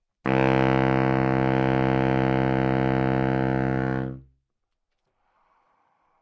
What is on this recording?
Sax Baritone - C3
Part of the Good-sounds dataset of monophonic instrumental sounds.
instrument::sax_baritone
note::C
octave::3
midi note::36
good-sounds-id::5527
baritone, C3, neumann-U87, sax, single-note